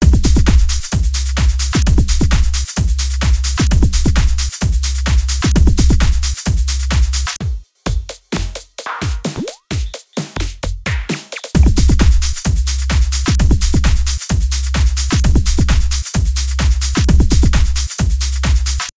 Music loop
loop, soundtrack